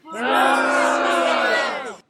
A crowd booing and heckling. This crowd is angry, and unhappy. Me booing and heckling layered in Audacity. Recorded using a Mac's Built-in Microphone.
boo, booing, crowd, heckle, heckling